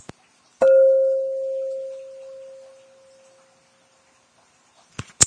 rec00031.bowl
recording of me hitting my wifes glass cooking bowl with a cloth covered wooden spoon
bell, bowl